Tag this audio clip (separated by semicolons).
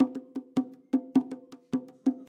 bongo; drum; loop; percussion